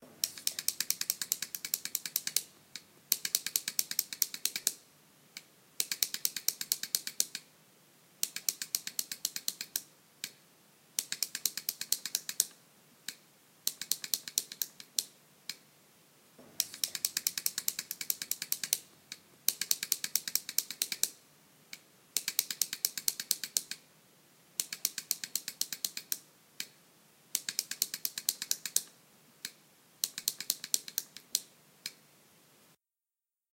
mySound GWAEtoy felt tip pens
Etoy, colour, mySound, texture